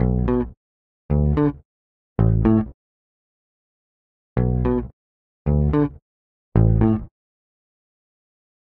and create your own disco bass loop
Cut and glue :-)
Tones : B , D , A